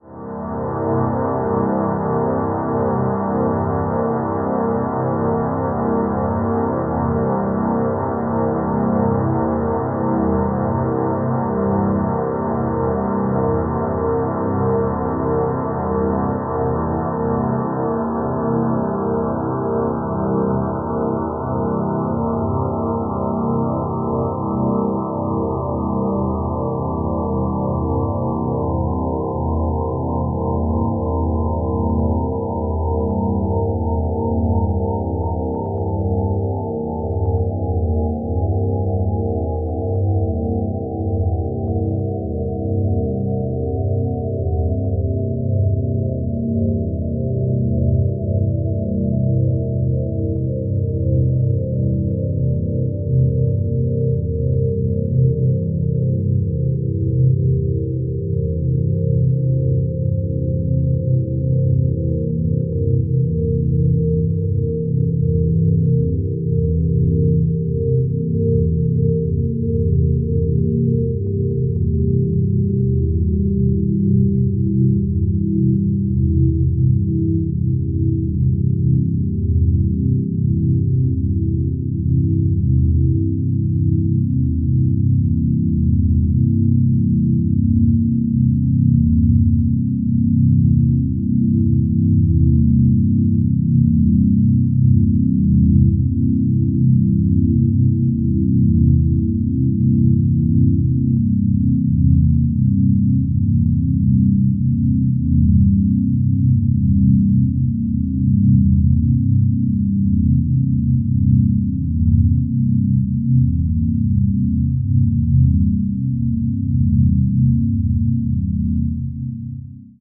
image drone
Two minutes long drone created from image of waves using SonicPhoto